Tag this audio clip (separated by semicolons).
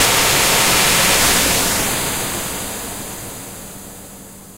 launch; missile; rocket